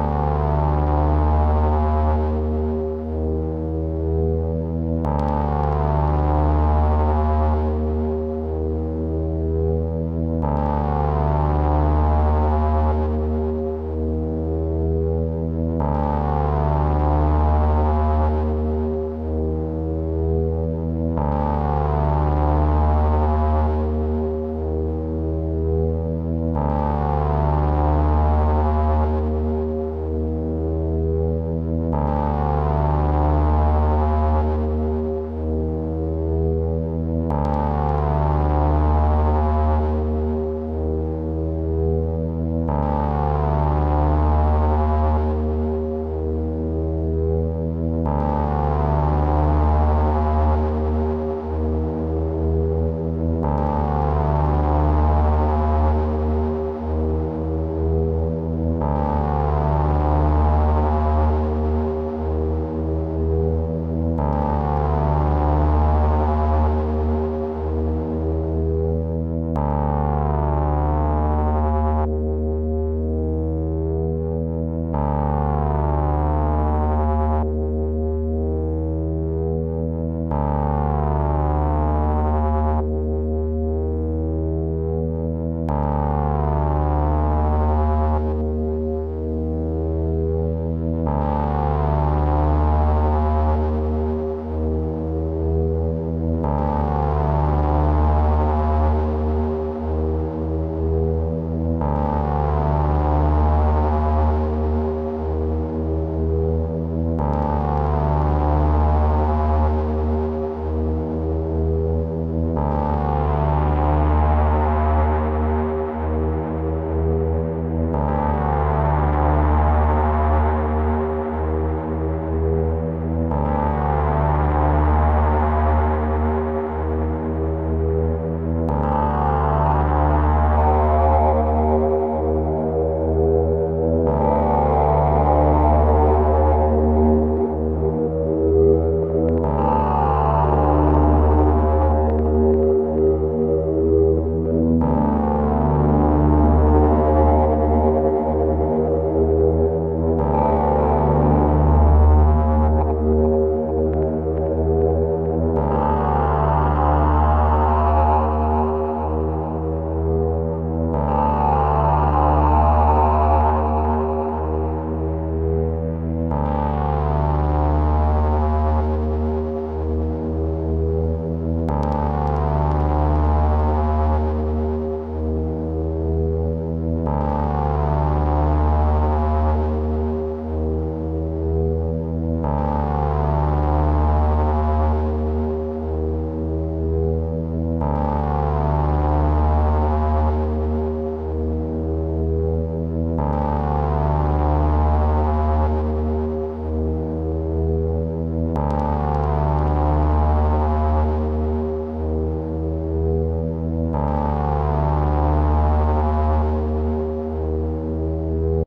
cs30-drone-01
Yamaha CS30 vintage analog synth drone sample, with slow LFO moderating pitch. Patch created by me. Recorded using DA conversion directly into iMac.